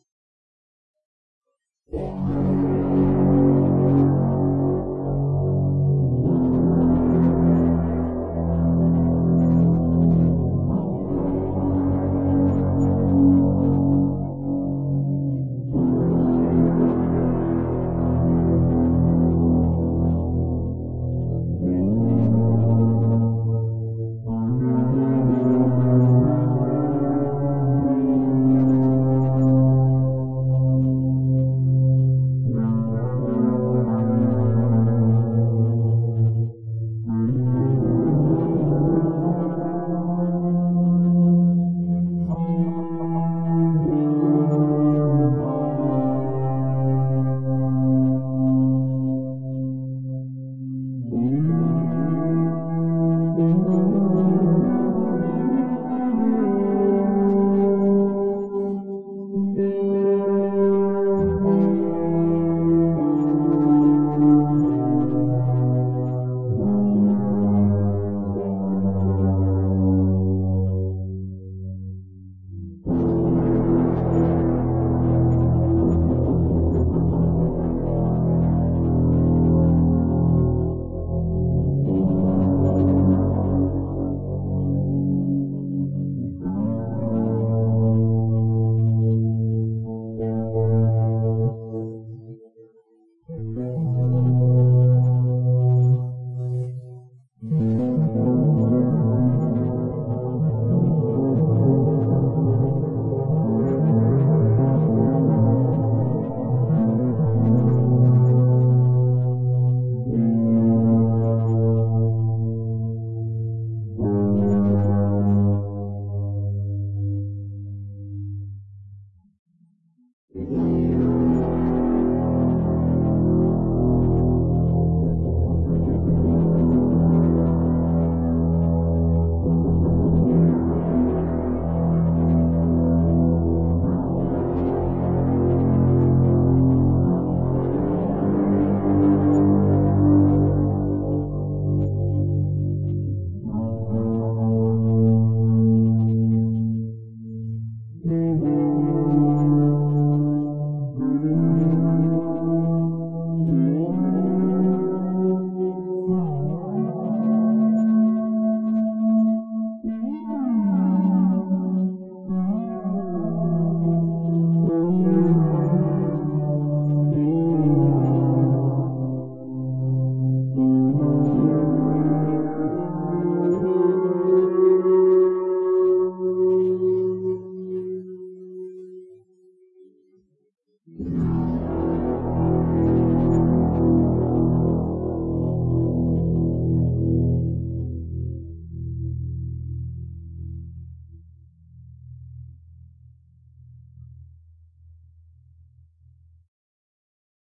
Psytrance riff
Created using guitar rig 5 plug-ins, Reaper audio editor and an Ibanez Gio series guitar.
Hope you enjoy it. Use it in your proyects or whatever you want. Thanks pals.
ambience, psycodelic, ambient, soundscape, sci-fi, dark, atmosphere, Space-music, drone